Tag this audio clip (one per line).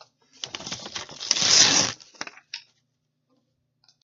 stationary
tear